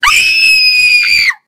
Female Scream
My friend (Sydney) screaming.